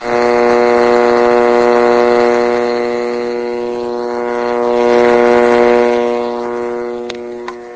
Zap Radio
This is a malfunctioning radio, which sounds like electricity.
radio, zap